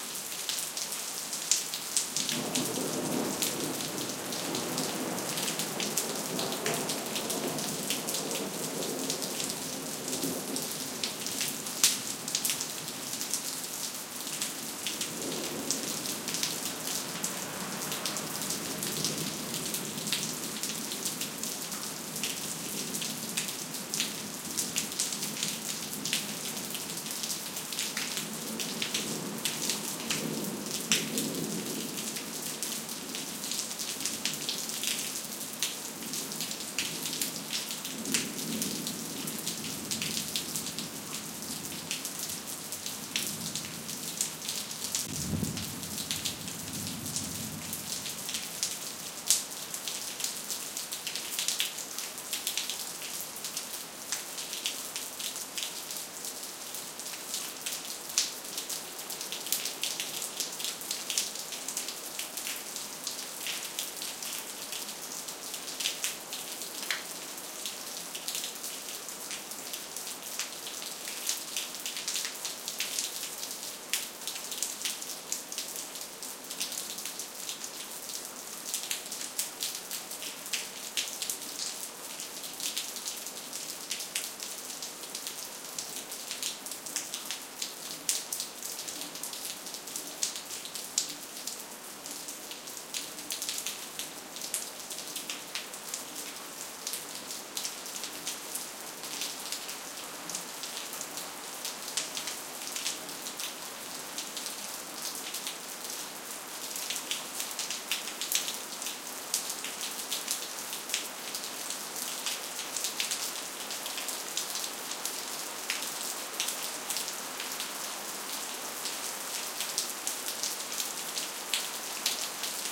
20151101 soft.rain.thunder.05
Raindrops falling on pavement + thunder. Primo EM172 capsules inside widscreens, FEL Microphone Amplifier BMA2, PCM-M10 recorder. Recorded at Sanlucar de Barrameda (Andalucia, S Spain)
field-recording, nature, rain, south-spain, storm, thunder, thunderstorm